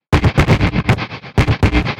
ground loop 4
created by shorting 1/4' jack thru a gtr amp
buzz, ground, loop, 120bpm